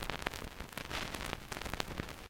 vinyl - in 01
The couple seconds of crackle before the music starts on an old vinyl record.
Recorded through USB into Audacity from a Sony PSLX300USB USB Stereo Turntable.
LP, album, crackle, lofi, noise, noisy, pop, record, surface-noise, turntable, vintage, vinyl, vinyl-record